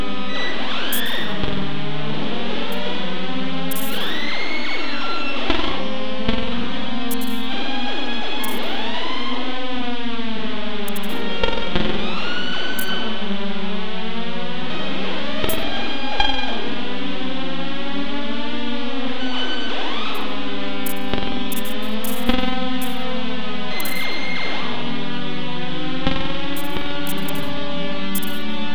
30-sectors B, C and D in danger
"Interstellar Trip to Cygnus X-1"
Sample pack made entirely with the "Complex Synthesizer" which is programmed in Puredata
rare, pd, idm, analog, ambient, puredata, experimental, modular